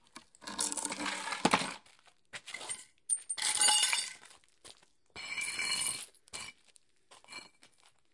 Dragging a piece of wood over already broken glass on a concrete surface.
drag, glass, wood